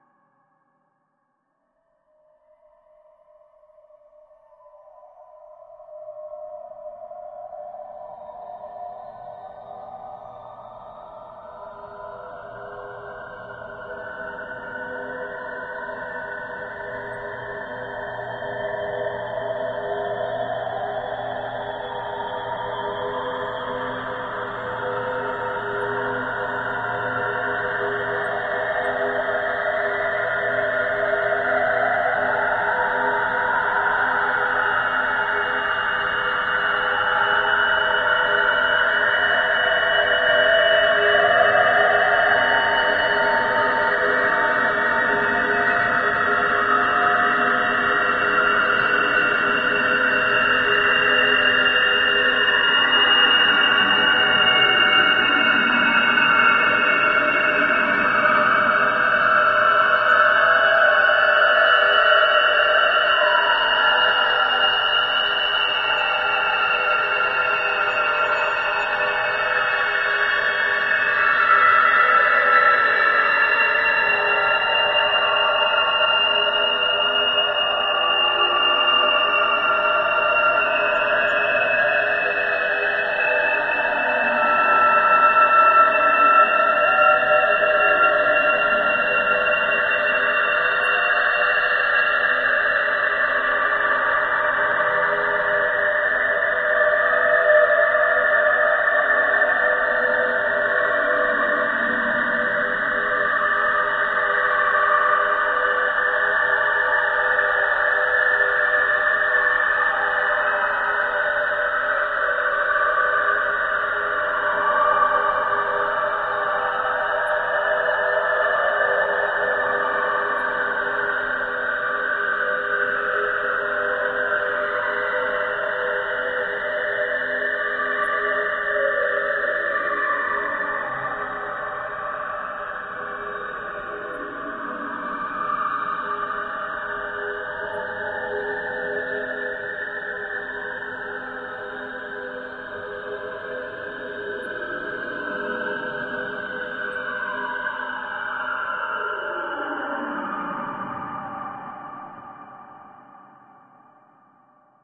LAYERS 009 - UltraFreakScapeDrone is an extensive multisample package containing 97 samples covering C0 till C8. The key name is included in the sample name. The sound of UltraFreakScapeDrone is already in the name: a long (over 2 minutes!) slowly evolving ambient drone pad with a lot of movement suitable for freaky horror movies that can be played as a PAD sound in your favourite sampler. It was created using NIKontakt 3 within Cubase and a lot of convolution (Voxengo's Pristine Space is my favourite) as well as some reverb from u-he: Uhbik-A.